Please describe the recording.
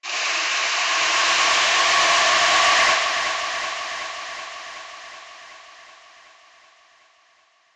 Sweep - Slight Effected C
This was the begin with a brush in our dirty old room of our radio-station :D
Effect, Fader, Panner, Slight, Sweep, ZoomH2